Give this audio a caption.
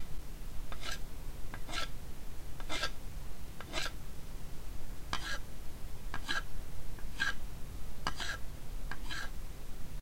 Knife Carve Wood
A knife gently carving a small wooden log.
Carving, Knife-Carve, Knife, Knife-Carve-Wood